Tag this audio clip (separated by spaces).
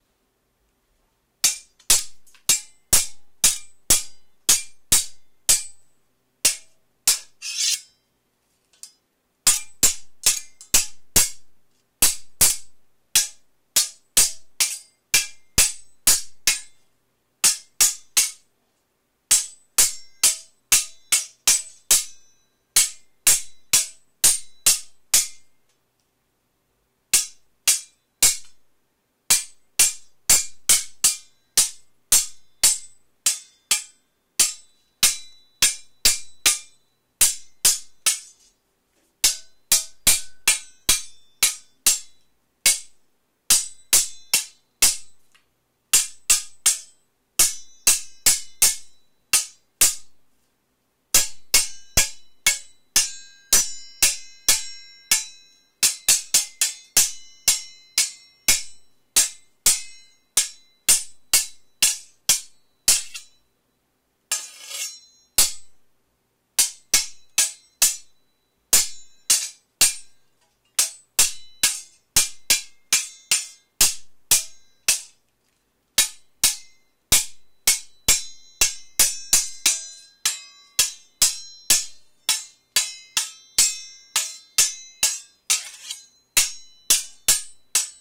Battle Fantasy Fight Medieval Sci-Fi Sword